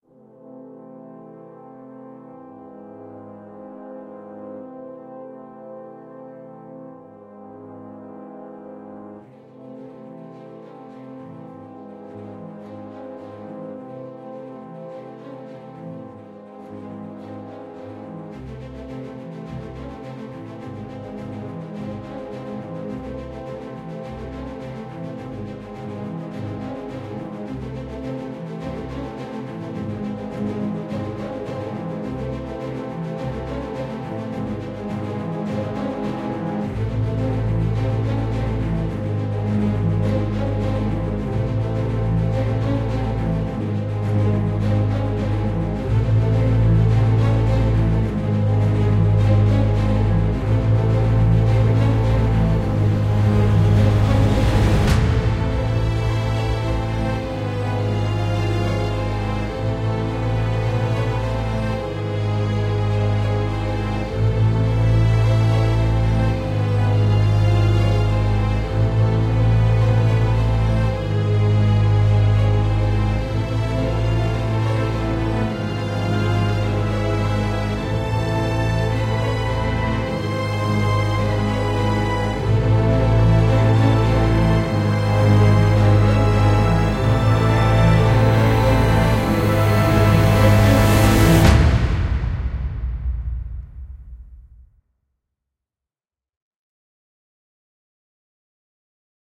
Cinematic Music-03
A brand new cinematic track ive been working on lately.
I would love to see what you did with the track
Enjoy.